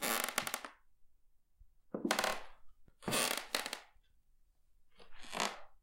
Creaking Wood 4 Steps
A single creaking wooden floor step. This is a longer recording with 4 creaks. There are 7 isolated floor creaks available in the same sound pack.
walk, horror, walking, house, creak, soundfx, floor, dark, boards, creaking, squeaking, stepping, board, foley, creepy, step, footstep, effect, old, foot, wood, sound, single